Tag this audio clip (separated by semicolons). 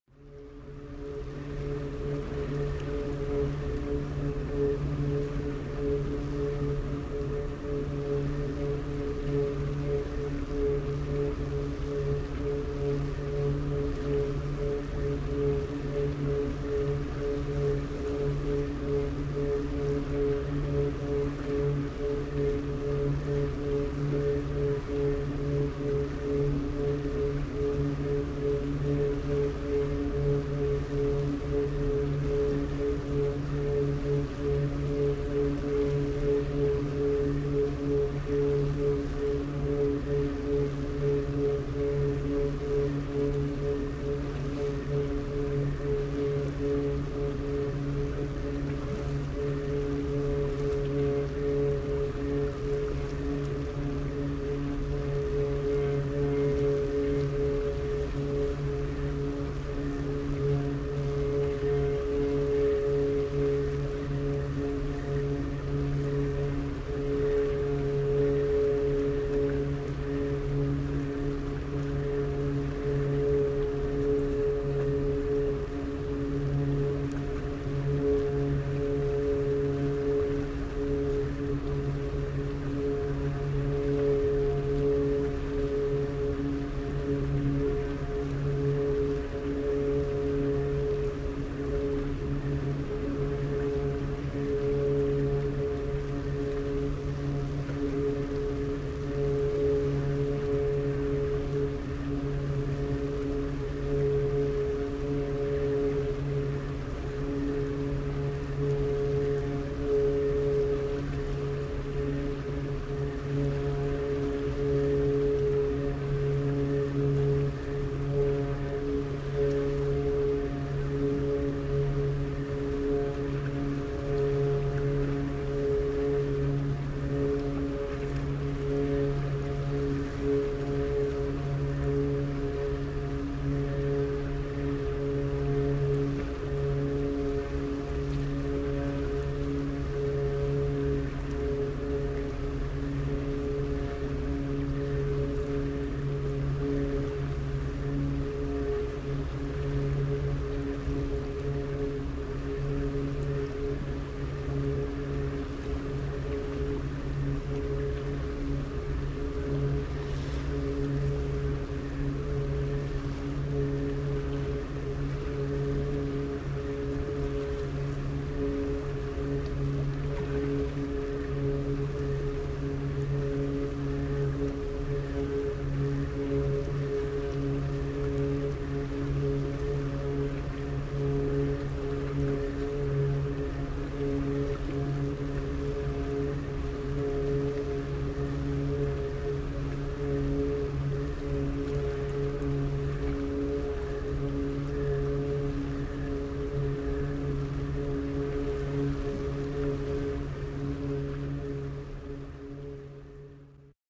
california; sherman-island